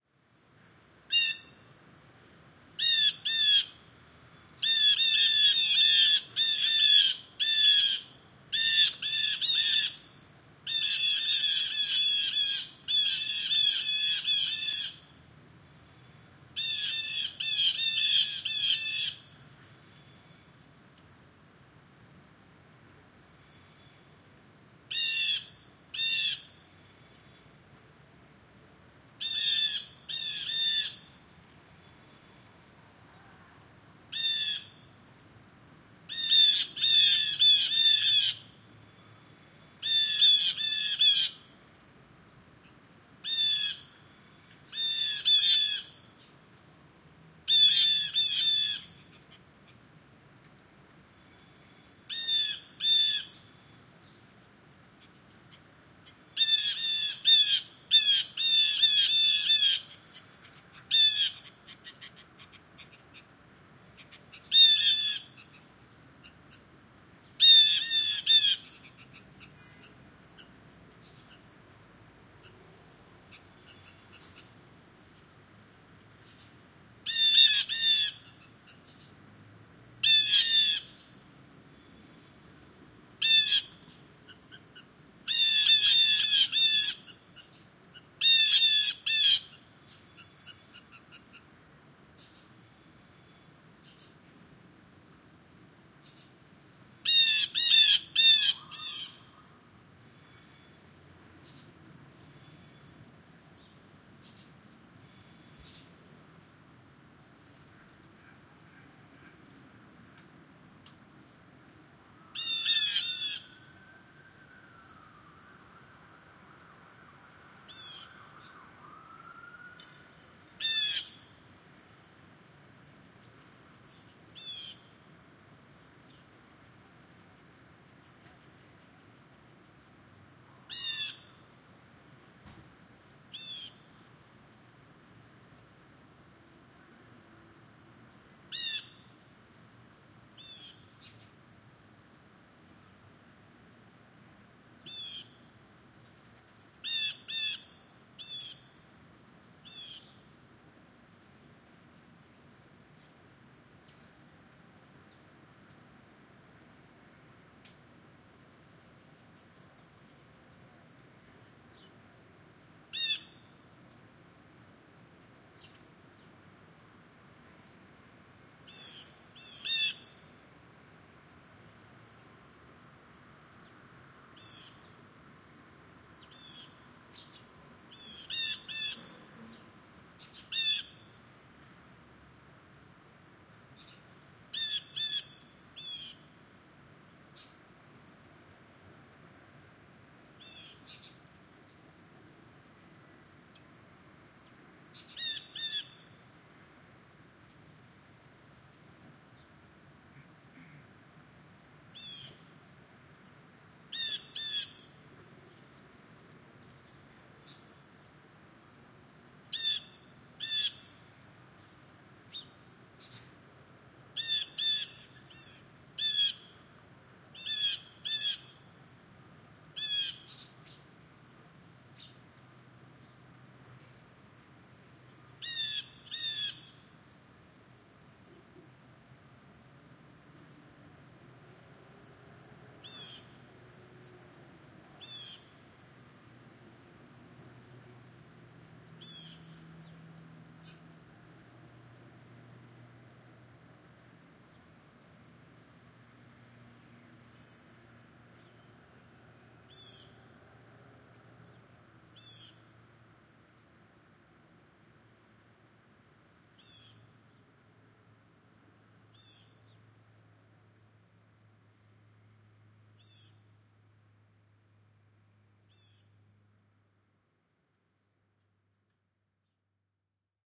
210913 Blue Jay, calls many, close, urban backyard, TORONTO 9am
Blue Jay calls, backyard, urban residential, Toronto. Roof mounted CS-10EM mics.